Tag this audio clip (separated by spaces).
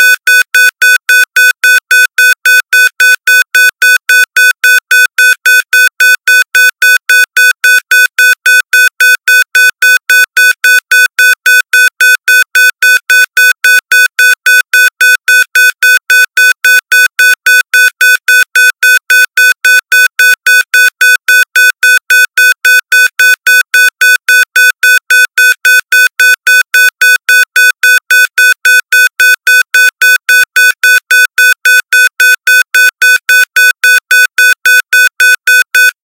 alarm digital error fuel glitch plane problem